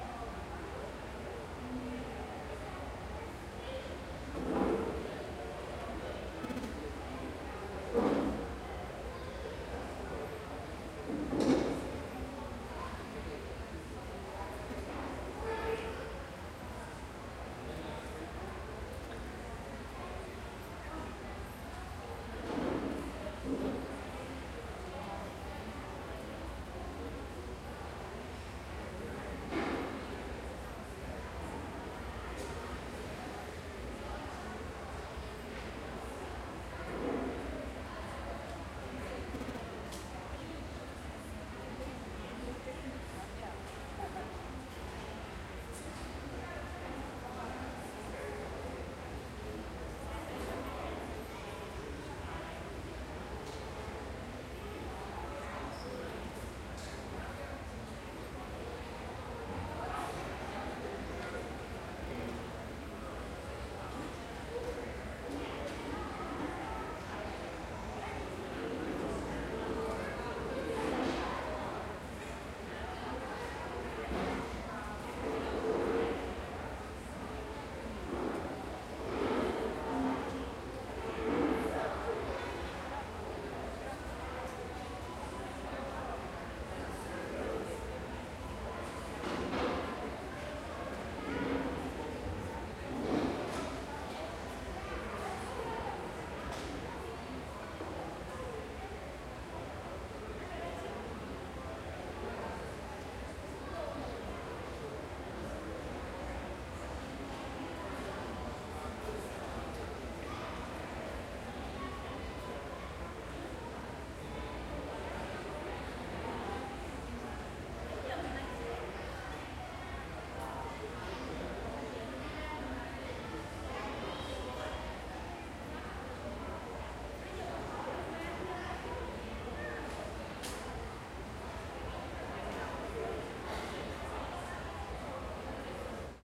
Ambience interior museum cafe
Interior of museum cafe with distant background voices and closer chair scrapes.
Ambience, background, environment, interior, museum, vox